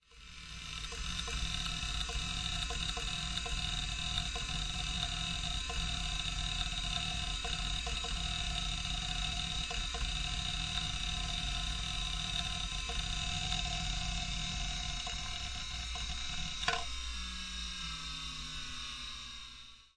Recording of a 1995 Compaq computer desktop hard drive. Features electronic whirring, powering up / down sounds, whirring, clicking, "memory access" noise. Could be used for e.g. a movie scene with computers in the background, glitchy techno, etc.